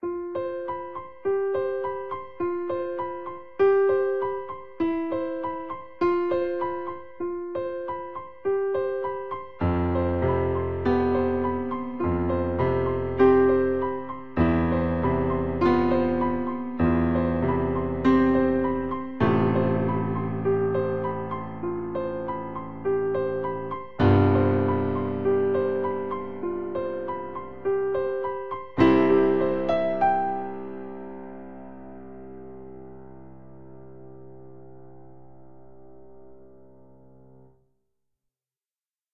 Wrote this while watching the rain outside my window. I was thinking about a friend who is going through a hard time. I couldn't come up with anything to do with it but maybe you can.
You can find me there too.